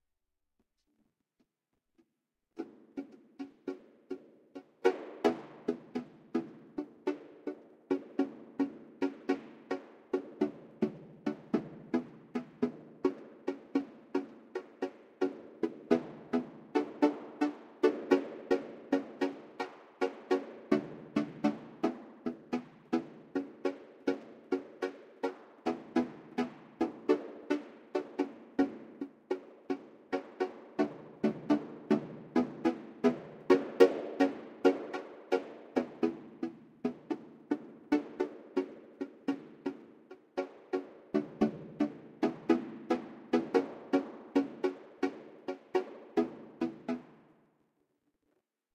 Microphone rhythmically tapped and set to a vocoder
rhythm, synth, vocoder